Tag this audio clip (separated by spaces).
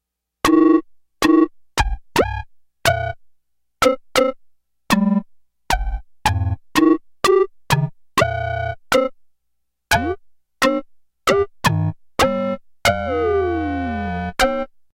computer science